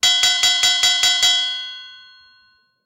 Winner! - Metal Bell Ringing Remix
We have a winner, folks! It's a bell ringing quickly, and then trailing off. Remixed from Metal Bell Ringing by Michorvath.
bell, clanging, contest, ding, dinging, metal, ringing, winner